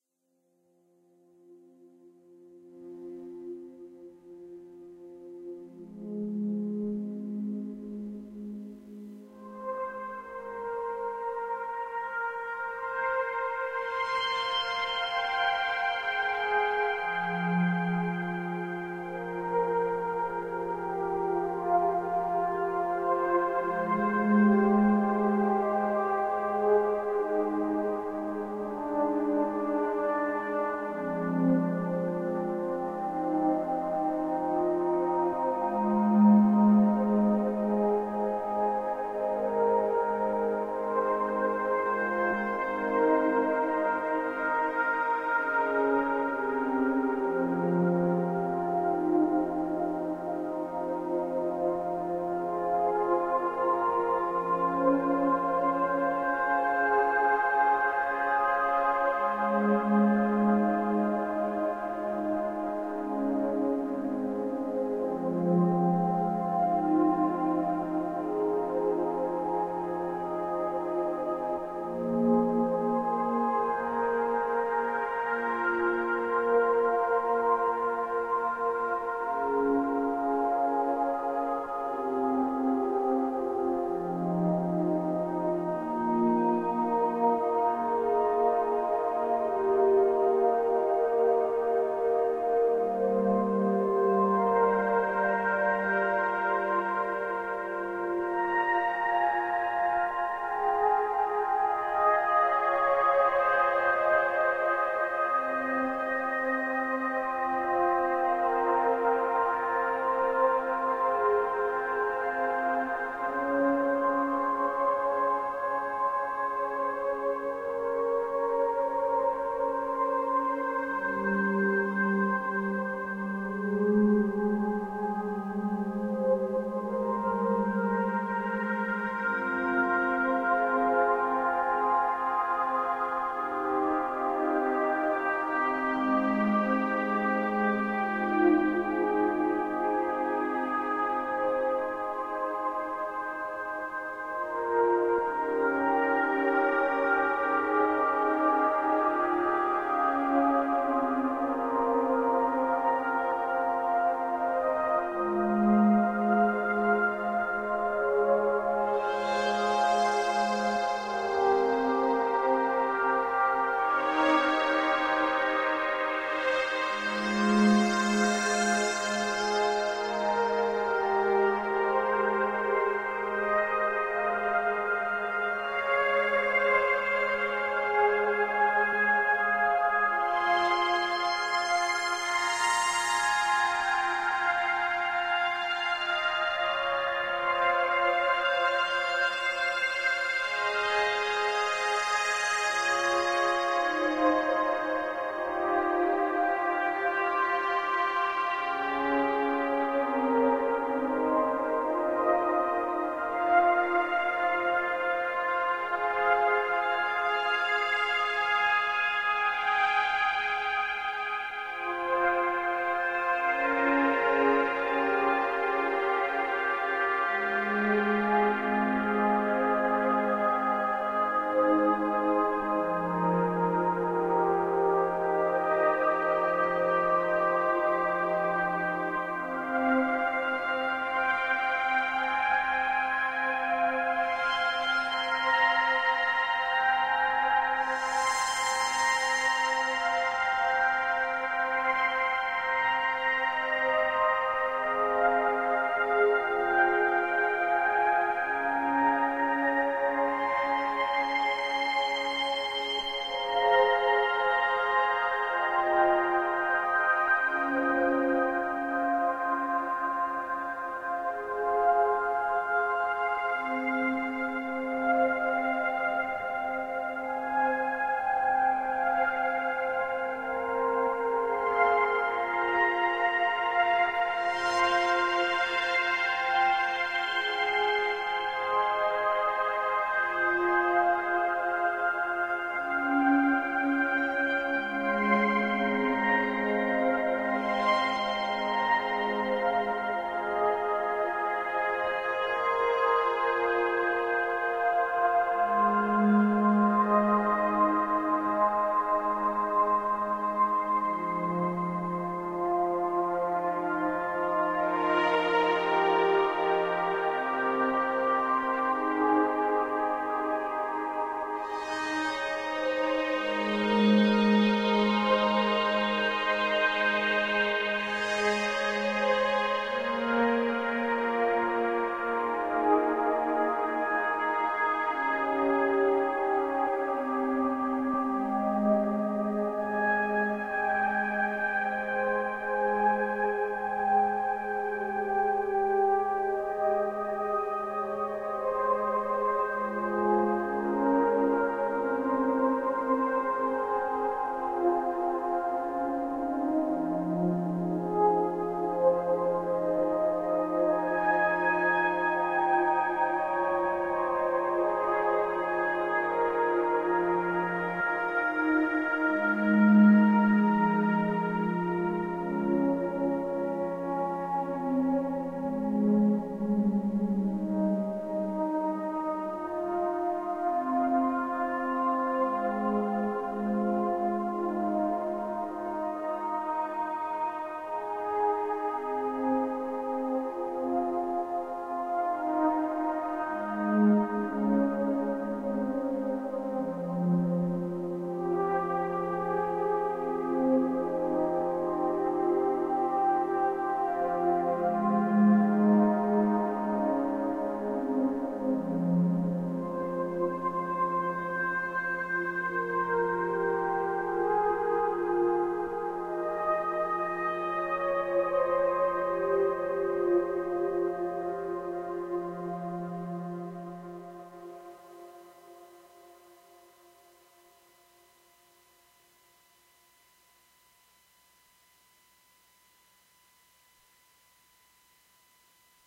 film for a music
made with minilogue and alesis quadraverb and my fingers
ambience, ambient, atmosphere, chill, dreamy, drone, emotional, evolving, film, fingers, heavy, minilogue, oblivion, pad, sad, skyrim, smooth, space, tolkien, wind